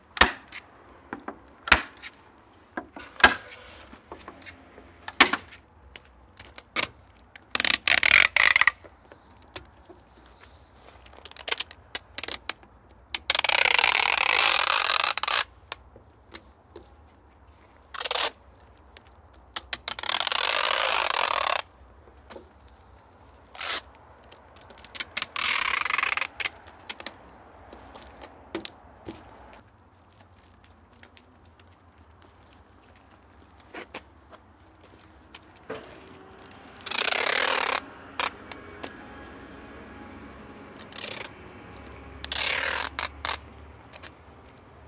masking tape handling pull stretch tape outside light traffic bg
pull, tape, stretch, masking, handling